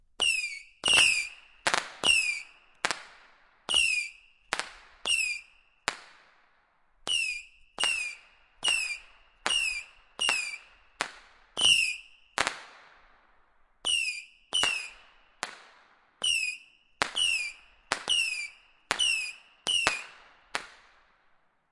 setting off a "missile box" with whistling crackling missiles